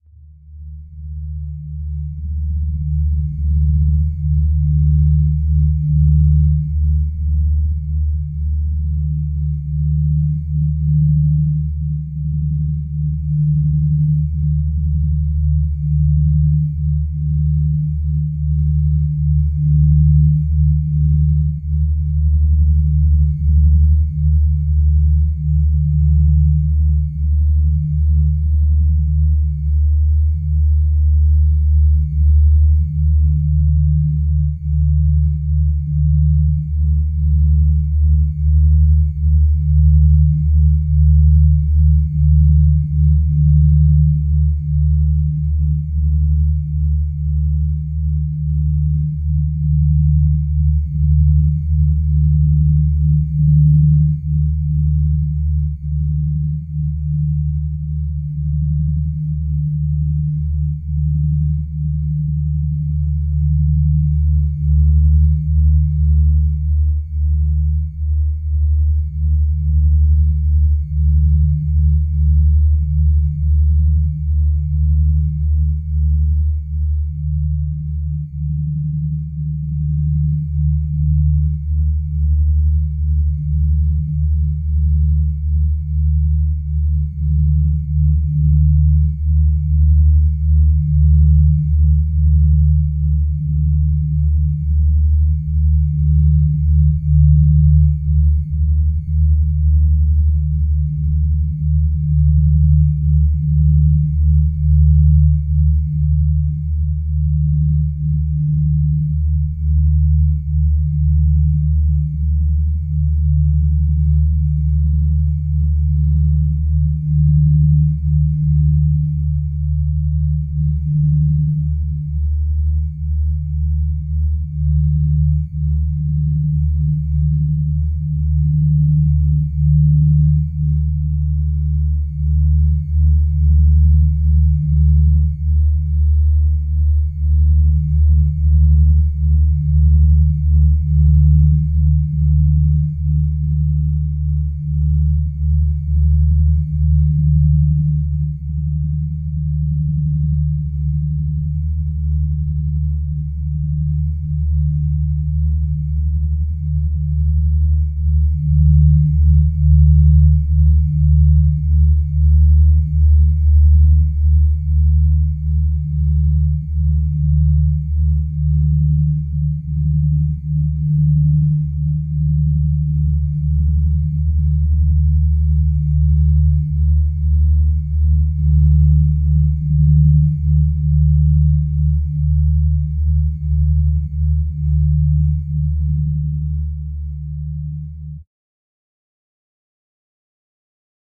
D phase drone 02
A Phase drone sound in the key of D. Made in ZynAddSubFX, a software synthesizer software made for Linux. This was recorded and edited in Audacity 1.3.5 beta, on Ubuntu Linux 8.04.2 LTS. Also i have added slightly more phase effect.
d, drone, synthesizer